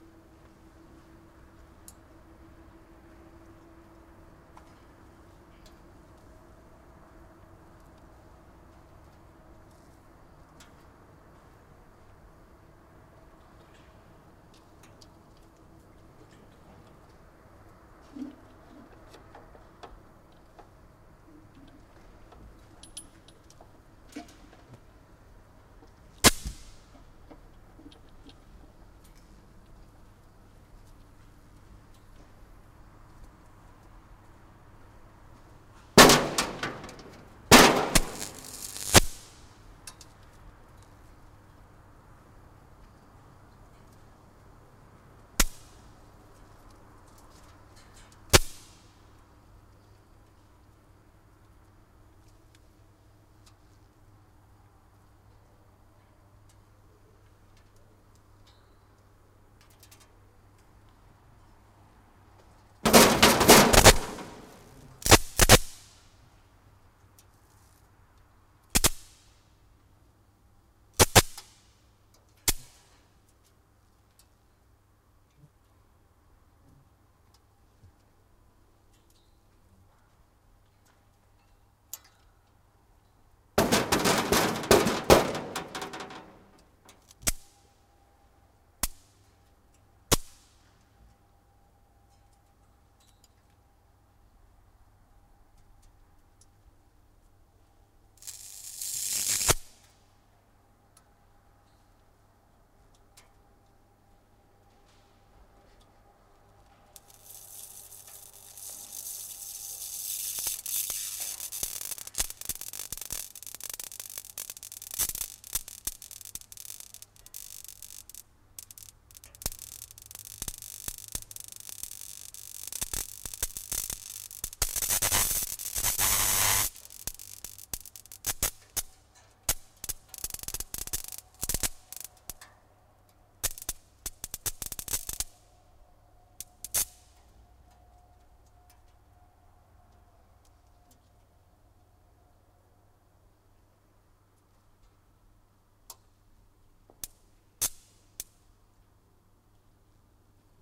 4824 night electronic trap insect
This sound is piece of night atmosphere i recorded years ago with reel-to-reel nagra4 and mkh416. You can hear night ambience of large hangar with lot of silent insects flying directly to electronic trap light and burn in very close detail. Another detail sound comes from hiting the metal body of film light.
buzz, electronic, humming, insect, jacobs-ladder, nagra, night, sparks, trap, voices